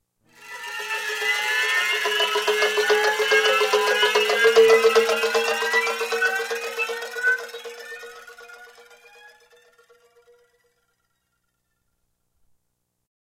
All Wound Up
Wound-up
Recorded on a MIDI guitar setup.
Watch, Clock, Tension, Wound-up